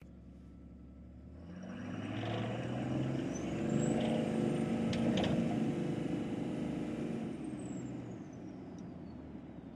Truck-Diesel 07Dodge Inside
diesel, inside